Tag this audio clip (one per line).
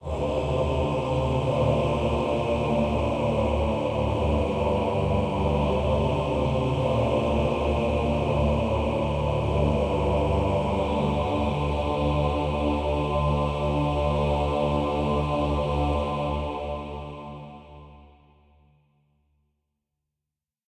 ambience
ambient
atmosphere
background
background-sound
choir
chor
cinematic
dark
deep
drama
dramatic
drone
epic
film
hollywood
horror
mood
movie
music
pad
scary
sci-fi
soundscape
space
spooky
suspense
thrill
thriller
trailer